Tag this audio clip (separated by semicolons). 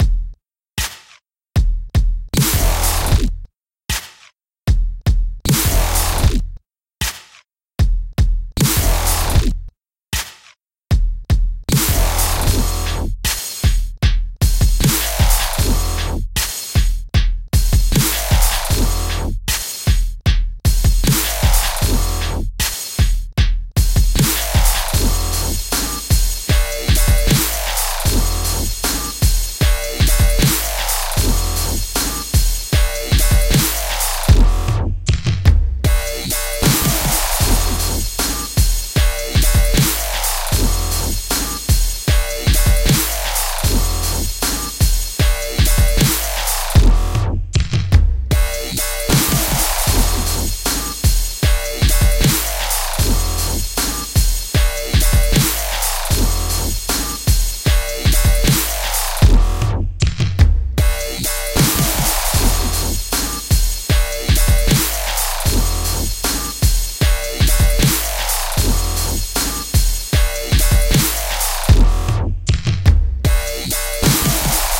BPM; Bass; Jam; EDM; Free; Synth; Wobble; Backing; Grunge; Rock; Techno; Blues; Loops; House; Classic; Music; Traxis; Guitar; Rap; Dubstep; Beats; Keyboards; Dub